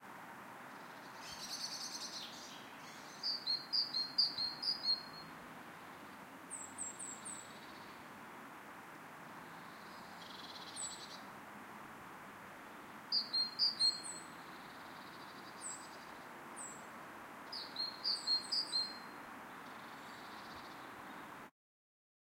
Birdsong 03 (Carmarthen)
Recorded with a Zoom H4N, some car noise in the distance.
Peaceful, Outdoor, Environment, Park, Birdsong, Tweeting, Nature, Field-recording, Birds